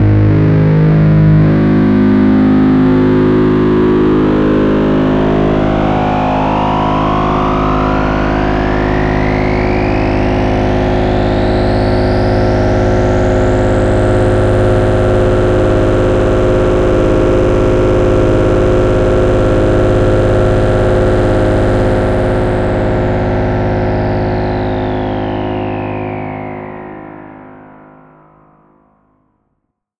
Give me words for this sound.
THE REAL VIRUS 01 - HARD FILTER SWEEP LEAD DISTOLANIA is a multisample created with my Access Virus TI, a fabulously sounding synth! Is is a hard distorted sound with a filter sweep. An excellent lead sound. Quite harsh, not for sensitive people. Enjoy!